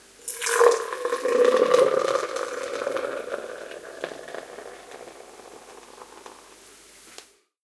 pouring bier
pouring a glas of beer
beer, glass, pouring